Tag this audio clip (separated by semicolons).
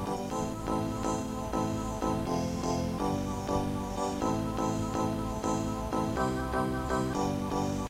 Amiga; Amiga500; bass; cassette; chrome; collab-2; Loop; Sony; synth; tape